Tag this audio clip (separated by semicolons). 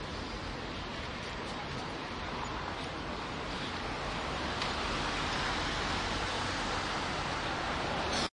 city; field-recording; independence-hall; philadelphia